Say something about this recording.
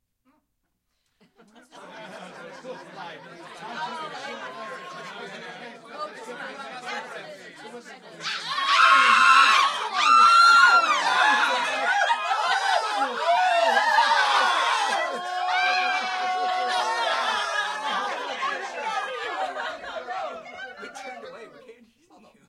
Party then screams 2
Second take - A group of people talking as if they were at a party or gathering - then something HORRIBLE happens. This was made for background audio for a play in Dallas. Recorded to a Dell Inspiron through Audigy soundcard, simple stereo mixer and two SM58 microphones
crowd, human, soundeffect